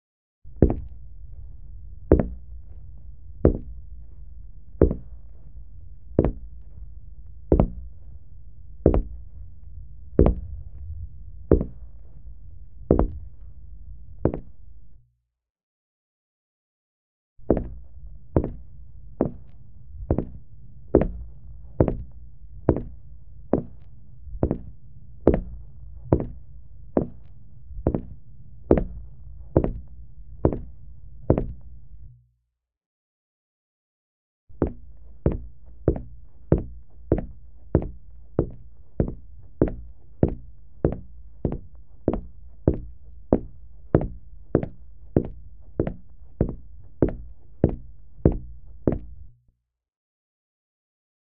Footsteps Concrete Slow Male Heavy
concrete, Footsteps, heavy, slow